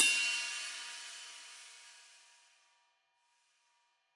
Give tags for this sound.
1-shot; cymbal; hi-hat; multisample; velocity

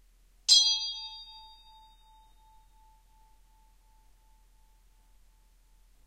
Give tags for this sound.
bell; bing; brass; ding

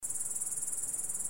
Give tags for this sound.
background-sound; Grasshopper; Nature; nature-ambience; nature-sound; naturesound; naturesounds

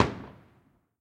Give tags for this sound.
cracker; explode; shot; sharp; pop; bang; shoot; fireworks; july; gun; explosion; blast; firework; pyrotechnics; pow; boom

